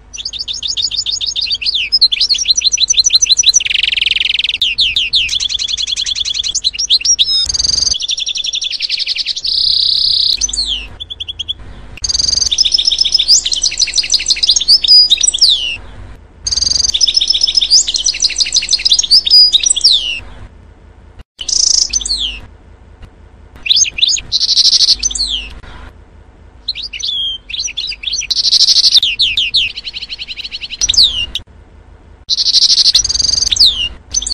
the best sound of goldfinch 1311
recording-garden, recording-home, recording, field